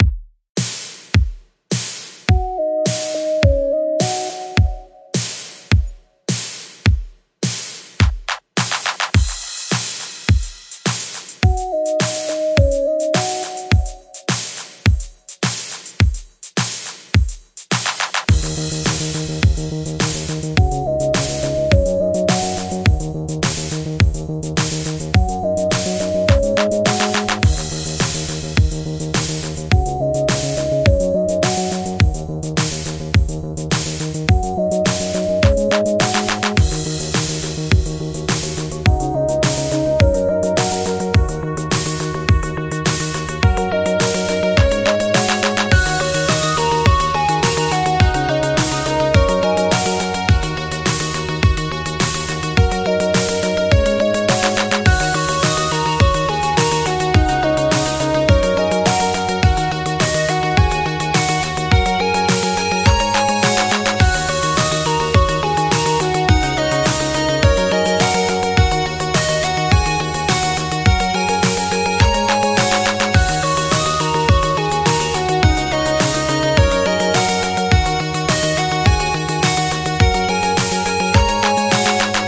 80s synthWAVE Vibe Loop
This loop was created in Bitwig using multipule third party VSTs and processors.
105-BPM, 1980s, Bass, Dance, Drums, EDM, Electric, electric-dance-music, Hook, Lead, Loop, Music, Synth, synthWAVE